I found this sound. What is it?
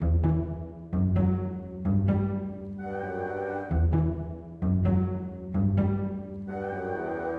Genre: Orchestra
Short Orchestra